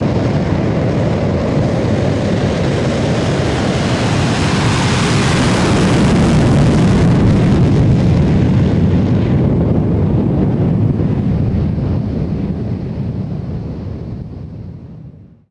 Jet plane launching on an airfield.
launch, technology, supersonic, fighter, jet, aviation, airplane, military, army, launching, flying, flight, plane, f-15